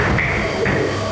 industrial sound design